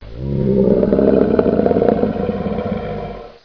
A voice sample slowed down to sound like the growl of a lion.